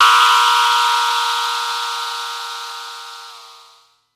The Yamaha CS-15 is analog monosynth with 2 VCO, 2 ENV, 2 multimode filters, 2 VCA, 1 LFO.
analog, cs-15, metallic, ride, yamaha